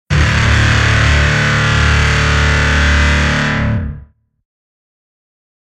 Here's another horn I made. It was stupid simple to make, however I liked the sound of it so I thought I'd upload it. I was inspired the capital ship horns in Elite:Dangerous that sound off whenever they drop in or out of hyperspace. This one is without reverberation.